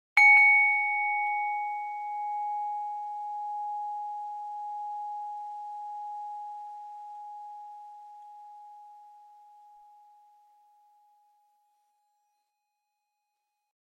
barn chime1
Individual wind chime sound
Recorded on Zoom H4n
chimes, bells, magical, windchimes